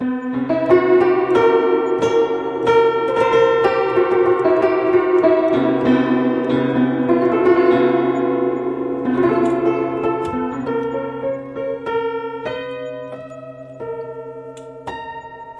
broken piano, zoom h4n recording